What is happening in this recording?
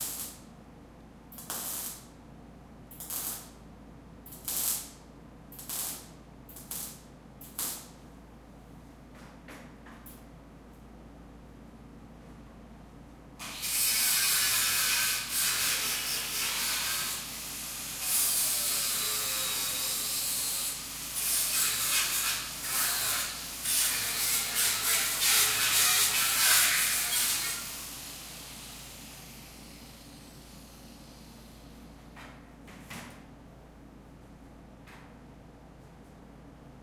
Recorded with a Tascam DR-05. Some really kewl welding sounds.